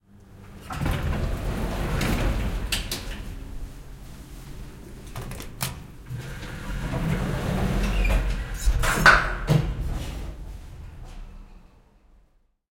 Automaattiovi, hissi / Automatic door of an elevator, a lift, sliding open and close, some echo, push of a button
Hissin ovi liukuu auki ja kiinni, vähän kaikua. Välissä napin painallus.
Paikka/Place: Suomi / Finland / Helsinki / Yle
Aika/Date: 10.11.1983